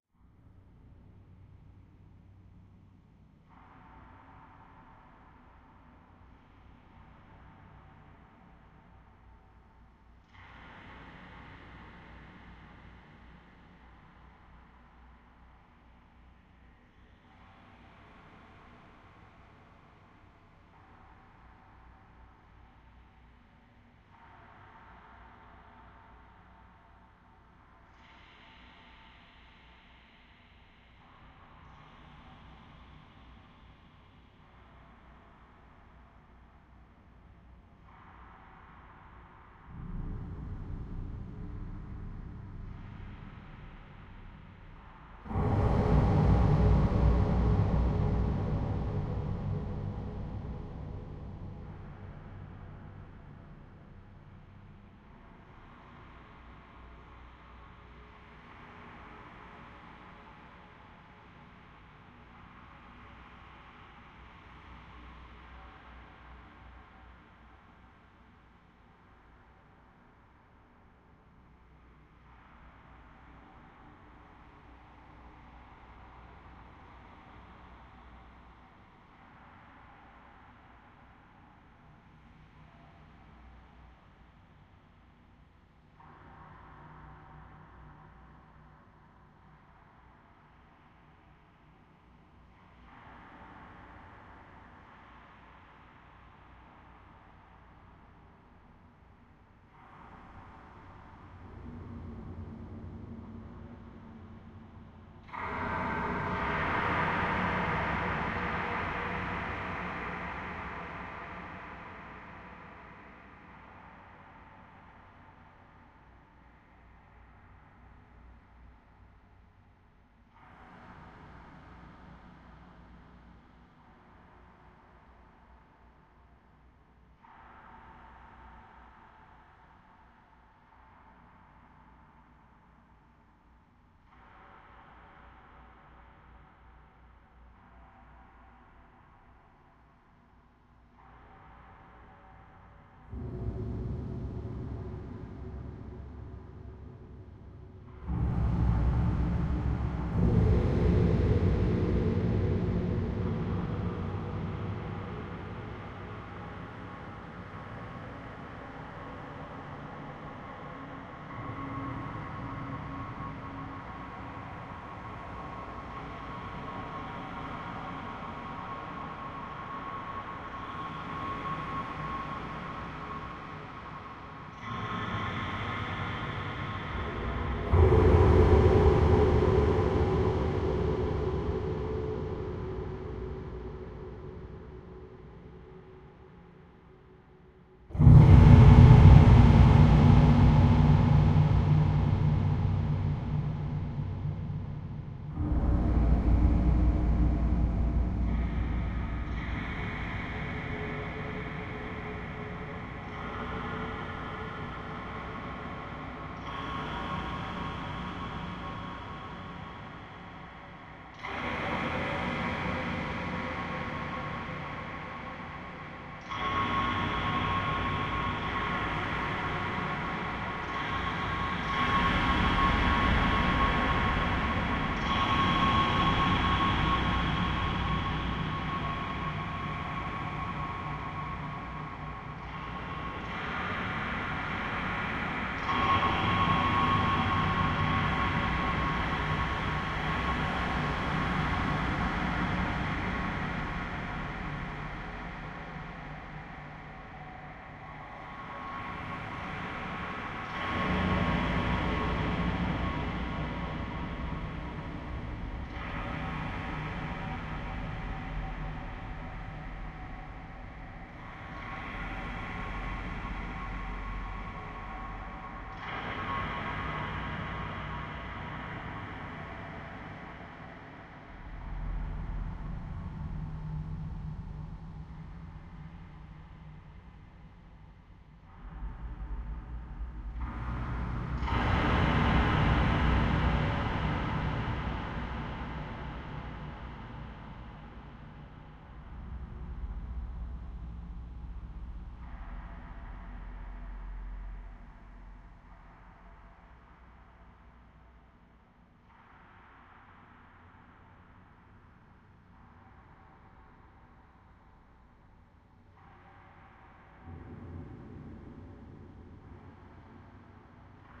Cave Dungeon Secret Temle
Ambient, Atmosphere, Cave, Chill, Cinematic, Dark, Design, Drone, Dungeon, Fantasy, Field-recording, Film, Free, Horror, Movie, Relax, Scary, SFX, Slow, Sound, Soundscape, Temple, Travel, Water